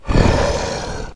Dinosaur/Dragon Roar
Recorded myself roaring and changed settings using Audacity. Basically, just removed noises and changed tone.
dragon, monster, roar